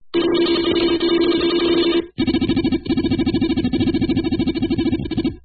I've been experimenting with glitch music and sounds, and it occurred to me that what better distortion/glitch speaks to our current times than a poor audio quality video call?
I set up a GoTo Meeting from my Linux music computer to a Windows laptop, sending audio from Linux to Windows via the meeting audio. I also used some networking tools to cause packet loss, meaning not all the data I sent from Linux actually arrived at the Windows machine. This caused a variety of artefacts that are well-known to anyone who has had a poor quality video call (I think at this point that would be most of us). The output of the Windows laptop went into an analog mixer and then back into Linux, where I recorded the glitchy sounds in the Ardour DAW.
The source material is a variety of sounds and loops I've created; a lot of TR-808 drum loops, some sounds from my homemade modular synthesizer, my Korg Volca keys, and some random samples I had laying around.